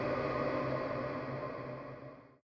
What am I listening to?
ah sound, downshifted